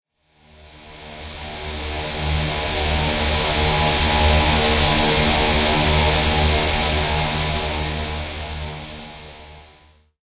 Buzzsaw Addiction
Noisy Pad sound, similar to that of a saw.
pad, single-hit, edison